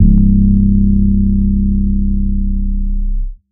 Some self-made 808s using various synthesizers.
heavy, fat, 808